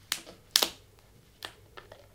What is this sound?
bottle cap 1st open 001
The cap of a fizzy water bottle being unscrewed. This is just the sound of the plastic seal breaking.